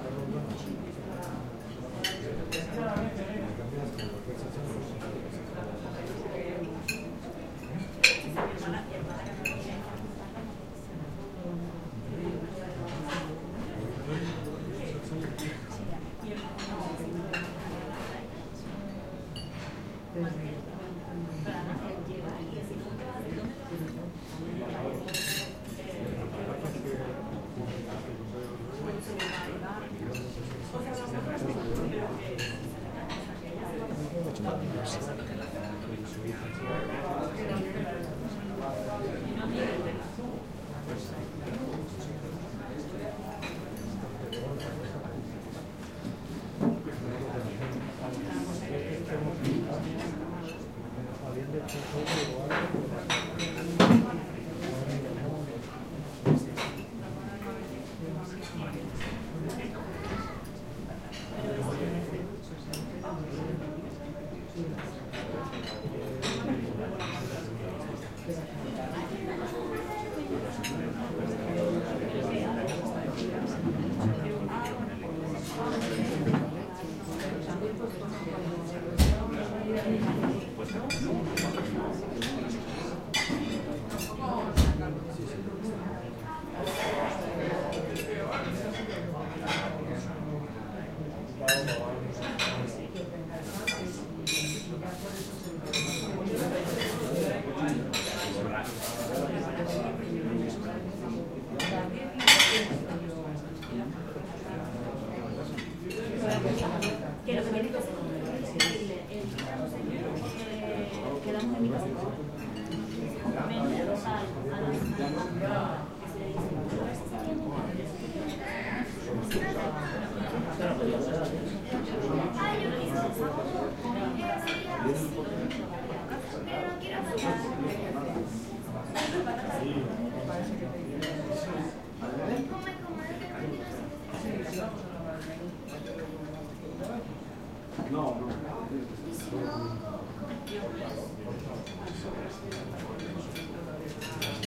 Ambient sound from the Restaurant Asador El Tormo, in Brihuega, Spain
Sonido ambiente del Asador El tormo, en Brihuega, Guadalajara.
Recorded with Tascam DR-07X
16 bit
Sound recording by Juan Jose Dominguez.